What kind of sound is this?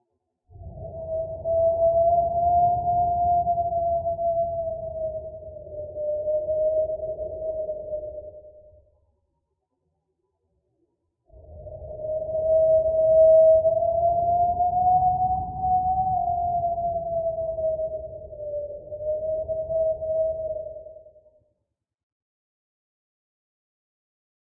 Spooky Wind
Made for one of my videos. Thought I'd share. A great whistling wind sound.